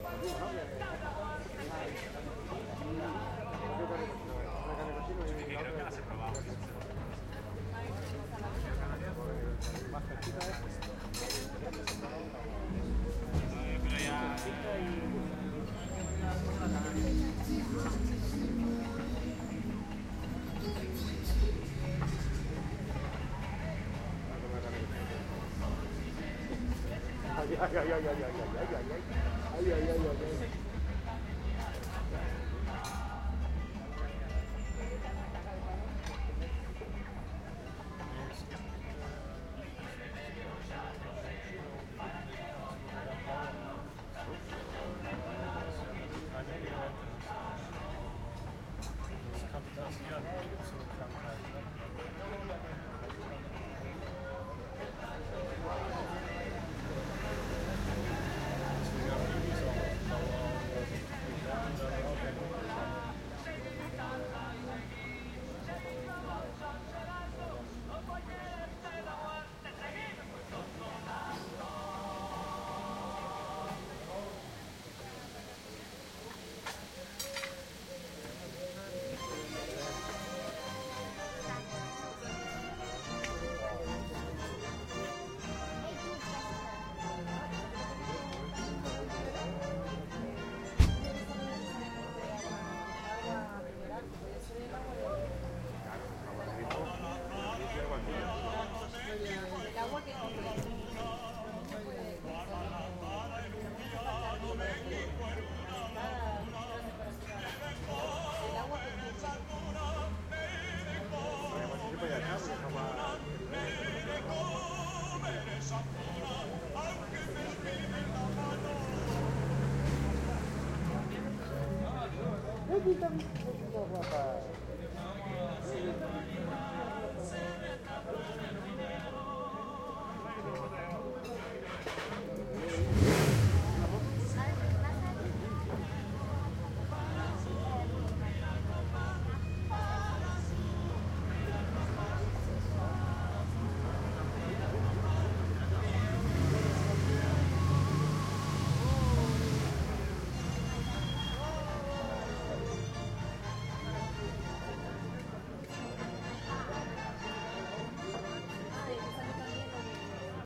Evening atmosphere outside the very excellent café and restaurant "San Anton" in Agüimes on the island of Gran Canaria. People are sitting outside the café, cars and scooters passing by, children are playing.
Recorded with a Zoom H2 with the mics set at 90° dispersion.
This sample is part of the sample-set "GranCan" featuring atmos from the island of Gran Canaria.